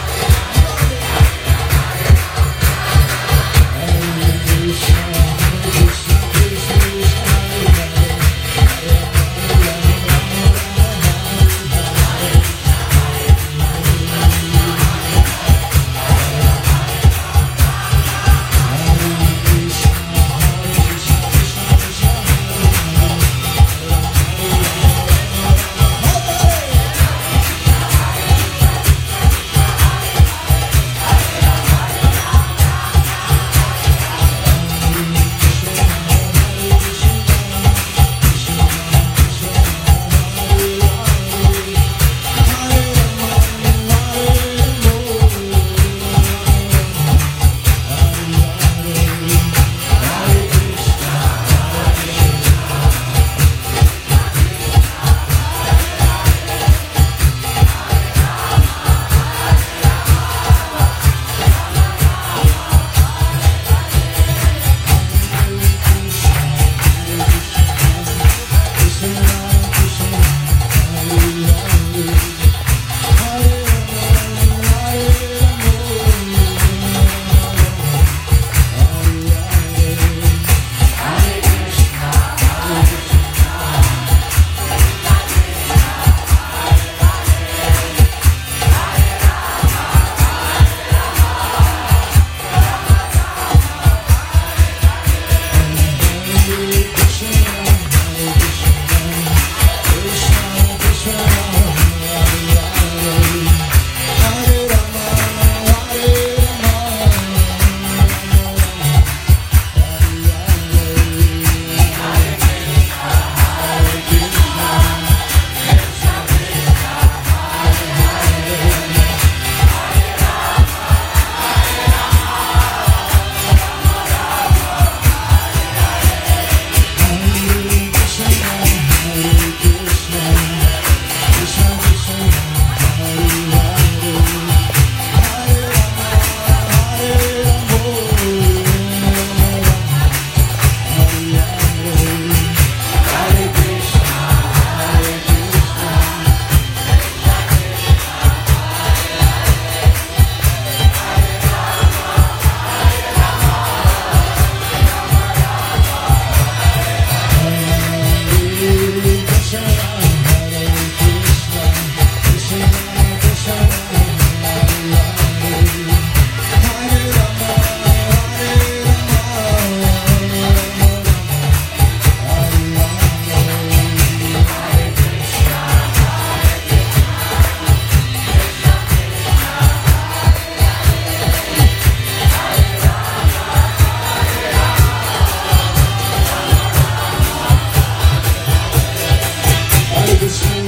kirtan song 08.09.2018 19.13
this recording was made in moscow at one of the Krishna services, on the day when Chaitanya Chandra Charan Das one of the teachers came